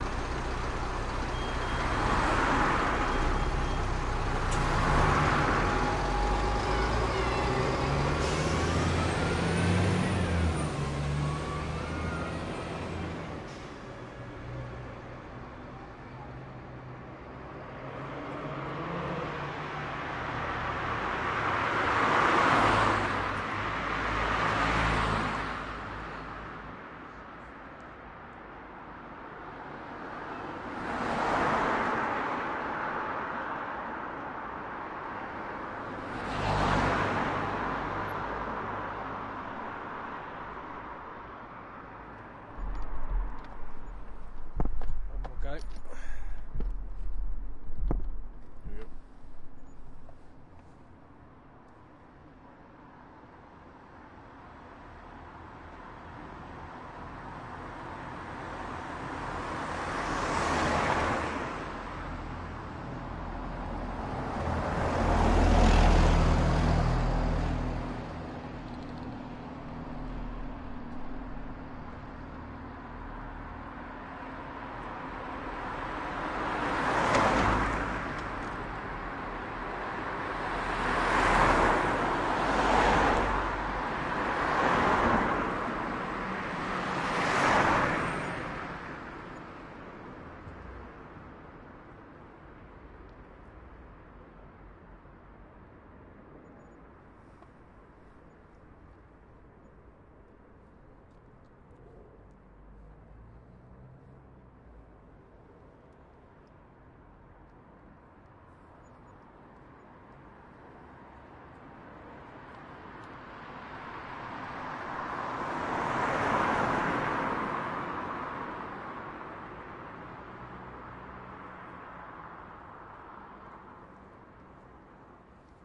This is a raw recording of car bys in London, UK. It'll need an edit and clean up for use.